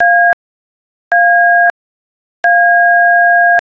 The 'A' key on a telephone keypad.
telephone, dial, keypad, dtmf, tones, button